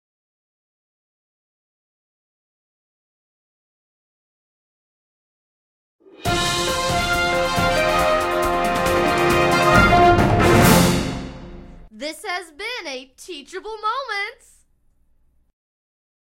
common Sfx
dun, soundfx